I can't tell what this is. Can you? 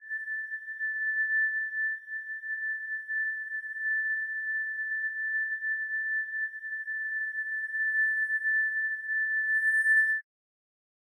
glass pad A
Applied Hourglass to glass-bowing sounds.